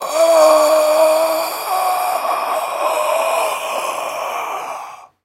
Dying Breath
For making an undead-like effect effect for games.
Recorded with a Zoom H2.
hell, living, reaper, life, grim